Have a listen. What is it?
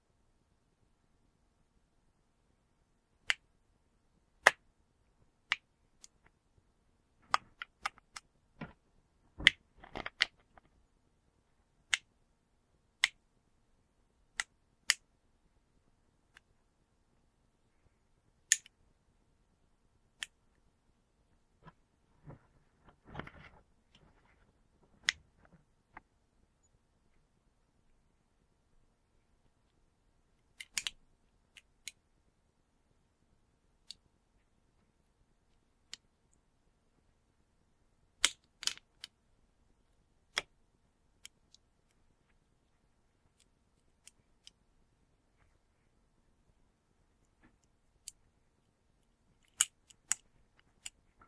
Crushing soda can 02
Me crushing a soda can with a seat clamp.
seat, soda, crinkle, bench, clamp, press, smash, crush, can